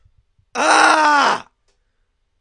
I was trying to demonstrate something and screamed into the microphone. I wouldn't have even kept it, but it has a pretty nice, genuine feel to it. Never actually found a good use for it, so maybe putting it up here will actually do some good.